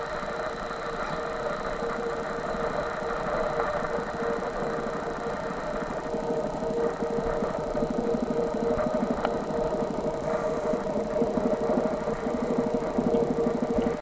Cam-internal
electronic, noise, strange, weird